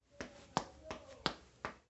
light steps
light, walk, steps